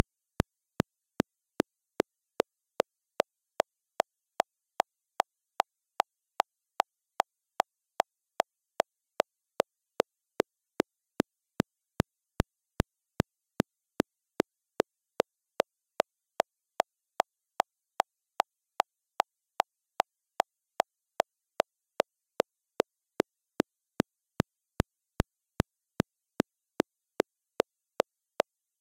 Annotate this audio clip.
chuck-scintilla
chuck, spark, glitch